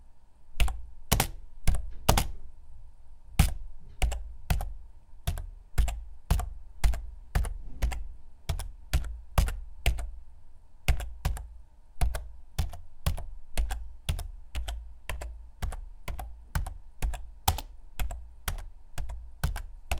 computer keyboard slow 1
Writing on a desktop computer keyboard slowly.
computer, desktop, keyboard, typing, writing